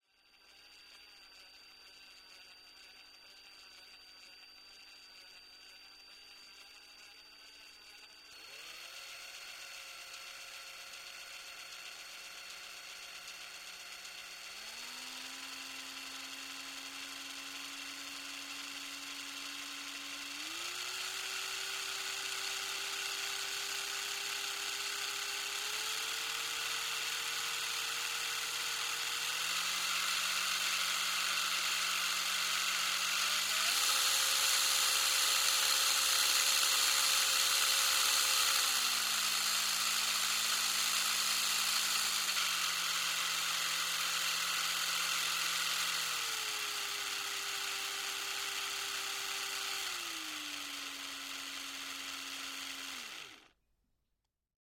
Drill Slow to Fast to stop
The sound of a handheld drill being fired up from a slow rotation, up to a fast then back to stop. Recorded using a behringer c2 and m-audio projectmix i/o. No processing, just topped and tailed